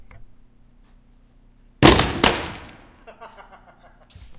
Weak table crash, break
Decided to smash some older, almost broken already furniture we had lying around our shop for a production that we were doing. The table was weak, didn't give much in the way of sound, but here it is.